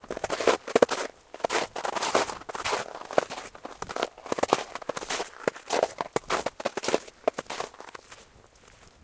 Walking in snow.
field-recording, footsteps, snow